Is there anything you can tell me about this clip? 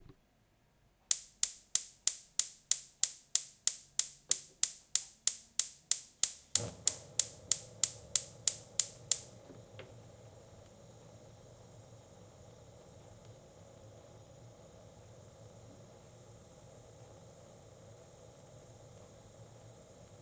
Stove electric ignition
lighter, stove, burning, flames, ignite, flame, ignition, burner, spark, burn